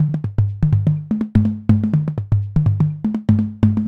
tom bass 1
synthetic low toms loop